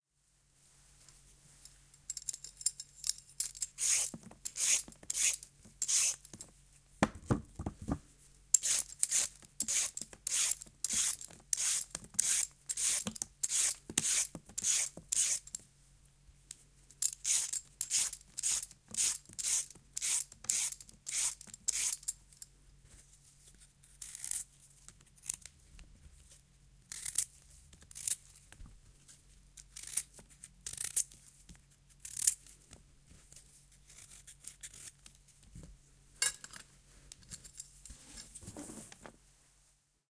Peling Carrots
chef, EM172, LM49990, Primo, vegetables